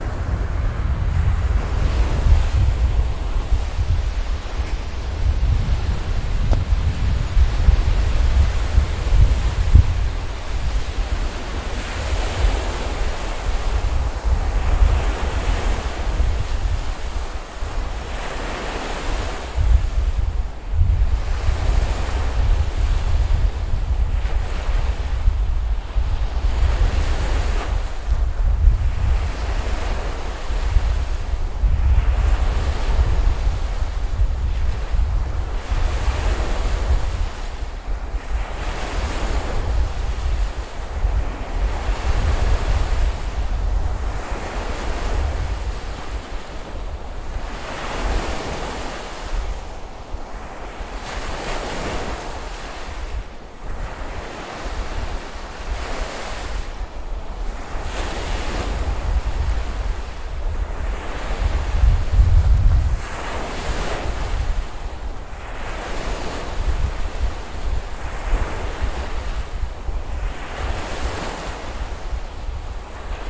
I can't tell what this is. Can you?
Stormy weather on Reservoir Obskoe.
Recorded: 2013-12-17
Recorder: Tascam DR-40
field-recording, Reservoir-Obskoe, nature, storm, wind, Novosibirsk, sea, thrumble, roar, thunder, weather